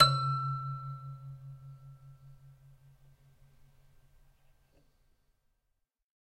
multisample pack of a collection piano toy from the 50's (MICHELSONNE)
toy, michelsonne